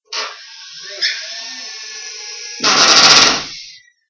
Here is a sound created by my uncles battery powered drill while he was remodeling our kitchen. Also don't forget to checkout all of the sounds in the pack.